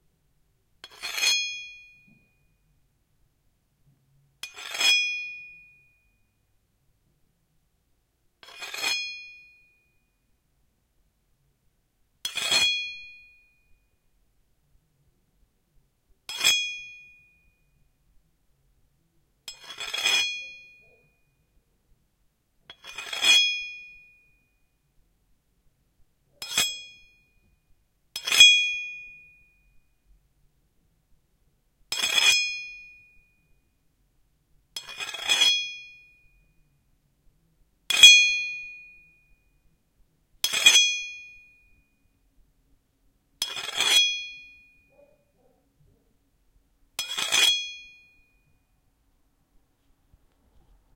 Sword blade 1(weapon, blacksmith, metal)
Metal sounds. Can be used as a sound of a sword or a blacksmith´s anvil.
blacksmith, metal, anvil, sword, blade